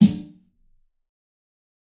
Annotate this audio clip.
fat snare of god 015
This is a realistic snare I've made mixing various sounds. This time it sounds fatter